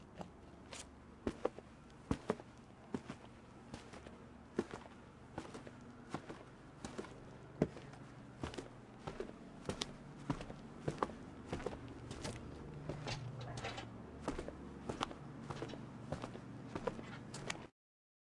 Footsteps Deck Walking
walking on wooden deck
walking, footsteps, deck